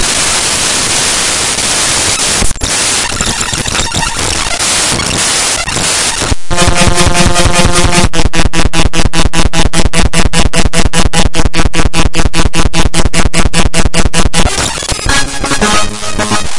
created by importing raw data into sony sound forge and then re-exporting as an audio file.
clicks, data, glitches, harsh, raw